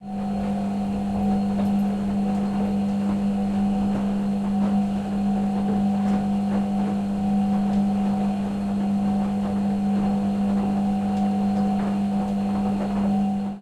Engine of concrete mixer
Sound of running concrete mixer.
electric, engine, machine, motor